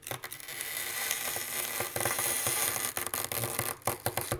Freezer Ice Scrape Knife 13
Scraping freezer ice with a knife